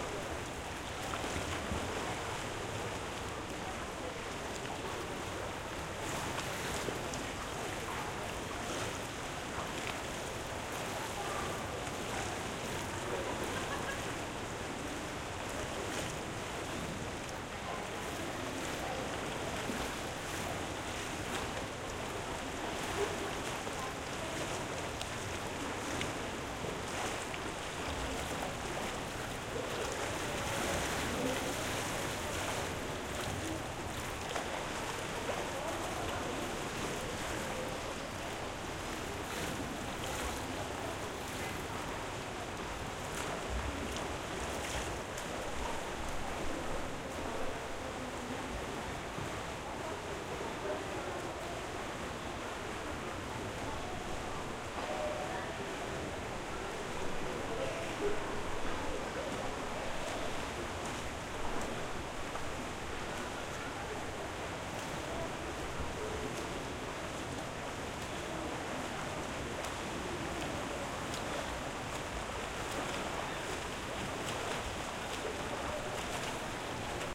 Swimming pool recorded in Strasbourg . X/Y microphone + Nagra BB + . 2016